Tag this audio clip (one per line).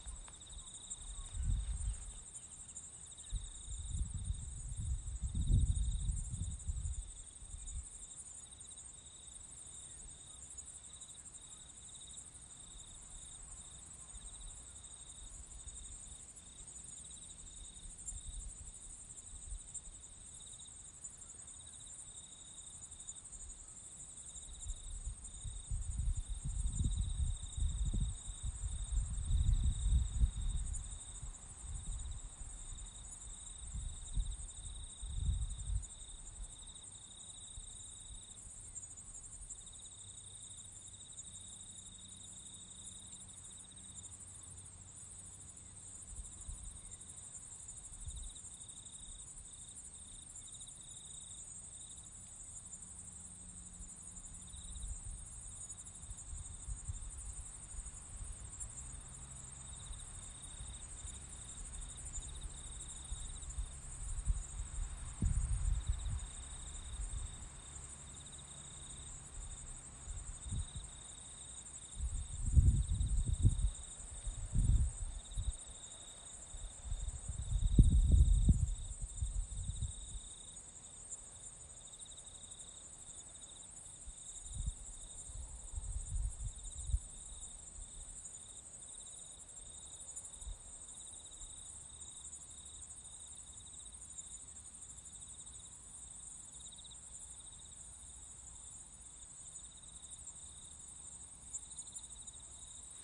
ambiance
ambience
ambient
atmosphere
background
birds
crickets
farm
field
field-recording
hilltop
nature
NewZealand
soundscape
Waitomo